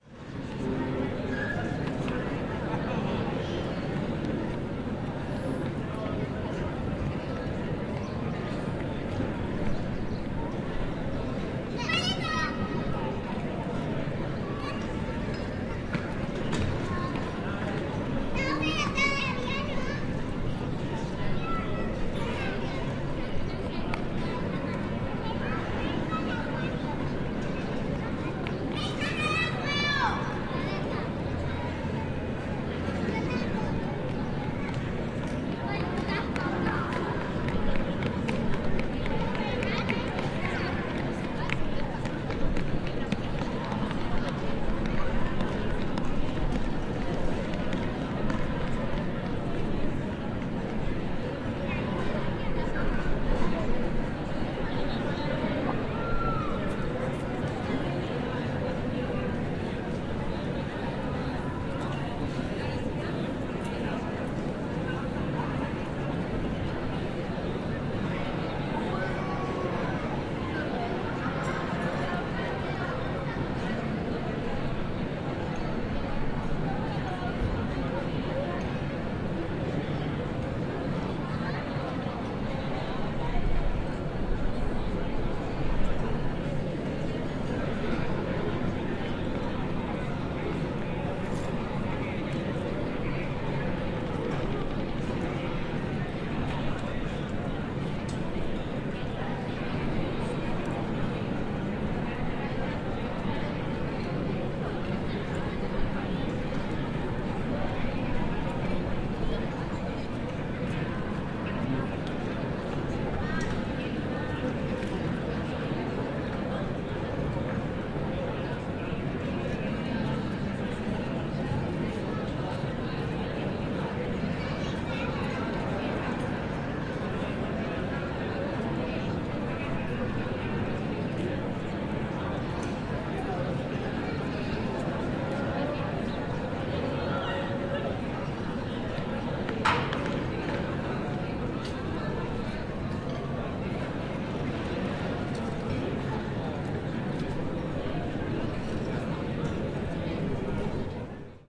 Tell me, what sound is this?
0223 Plaza Mayor
People talking in the background in the terraces of the bars and restaurants. Children playing.
20120324